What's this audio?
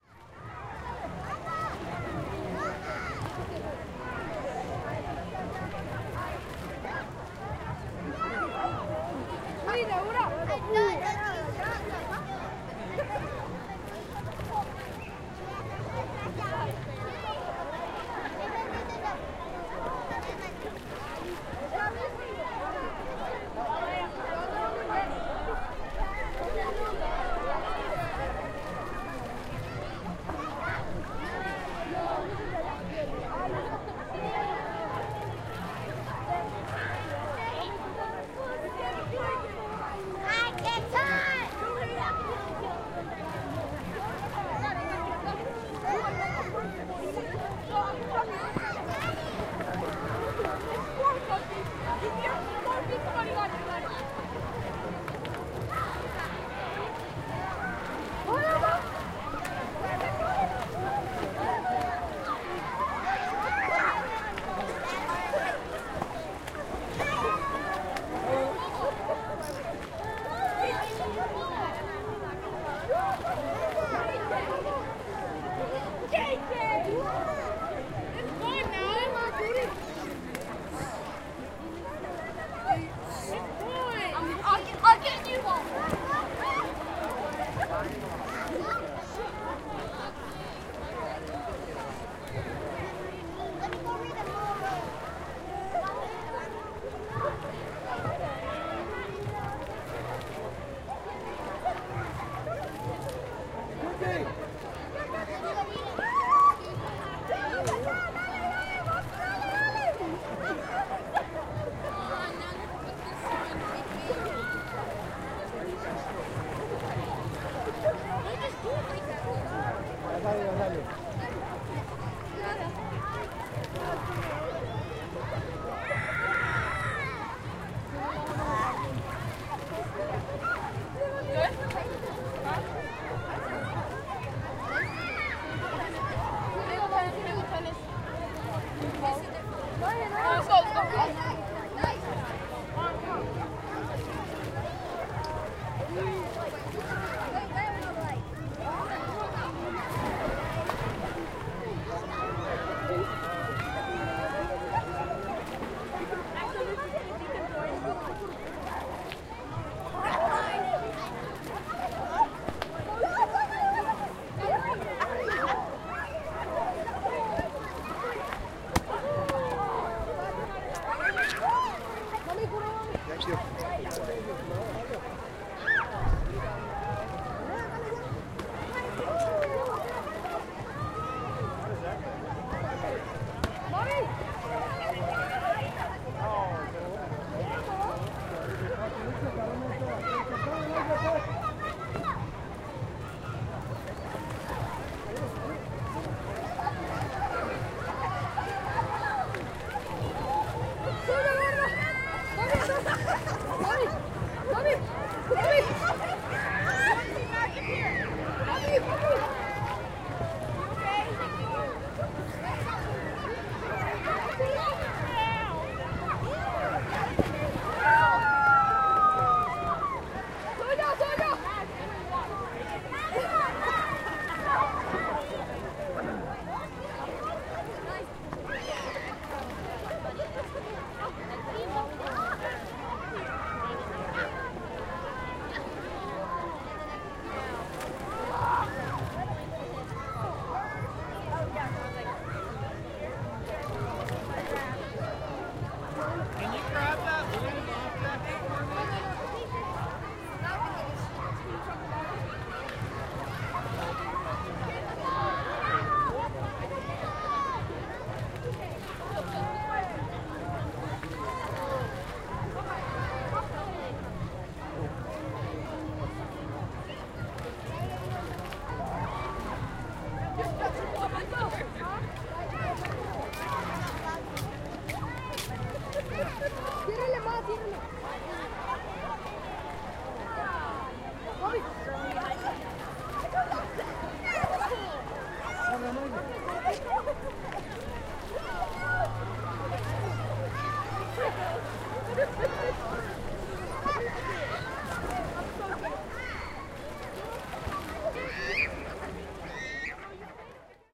Ambience, Outdoor Public Pool, A
Five minutes of raw audio of the giant 'guitar' pool at Disney's "All Star Music" hotel late in the evening. It was packed with hotel guests both in and out of the pool, several playing with inflatable balls. There isn't as much splashing as one would think, largely due to how packed the area was.
An example of how you might credit is by putting this in the description/credits:
The sound was recorded using a "H1 Zoom recorder" on 8th August 2017.
ambiance, ambience, disney, hotel, outdoor, outside, pool, public, swimming